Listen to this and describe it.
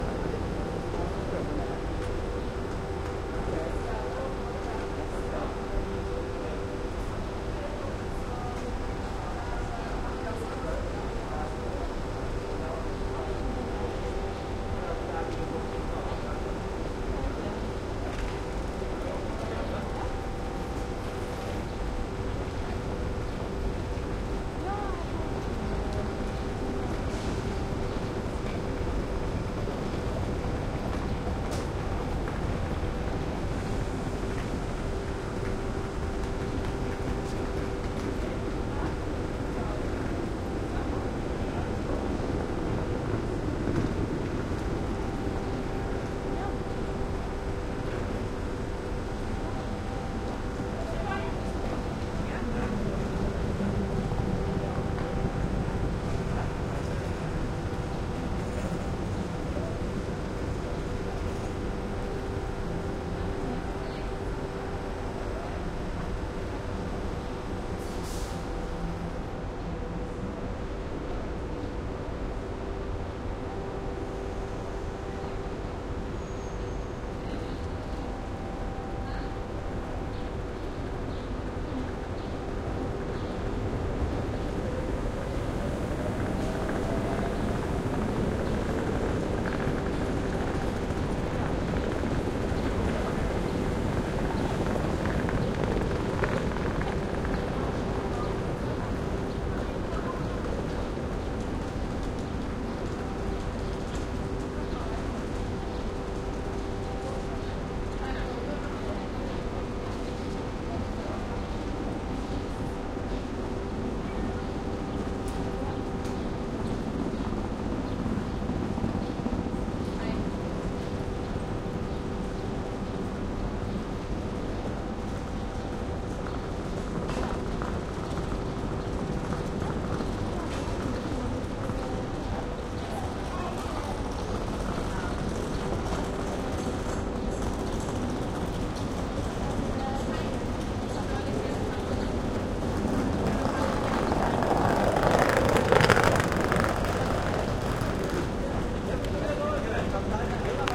Train Station
Waiting for a train in Oslo, Norway. Recorded with a Sony PCMM10.
departure, People, railway, station, train, trains, wall